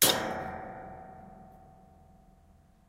Metal Hit 11
A bunch of different metal sounds. Hits etc.
Industrial, Machinery, Metal, MetalHit, Steampunk, Sword, Weapon